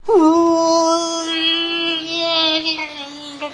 Me and my DS-40 mocking the droning conch shells and neanderthal bone flute music that blares thoughout the new age/ancient Mediterranean/Aztec sacrifice courtyard at an office (making a mini-movie about it).
voice, idiot, male, drone